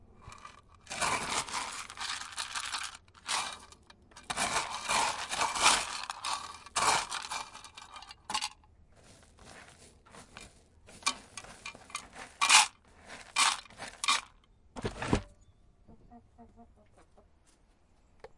Some noises recorded moving differents kinds of seeds in differents kinds of containers
farming, farm, seeds, henhouse